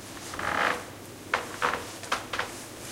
wood cracking. Olympus LS10, internal mics
wood, field-recording, cracking